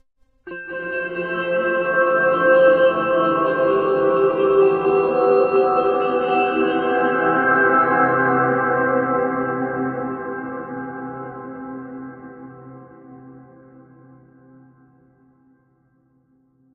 Dreamy Jazz Fantasy Ambient
SFX conversion Edited: Adobe + FXs + Mastered
ambiance, ambience, ambient, atmo, atmos, atmosphere, atmospheric, background, background-sound, Dreamscape, Dreamy, Elementary, Fantasy, Jazz, Light, Love, Reverb, Sound, Sound-design, soundscape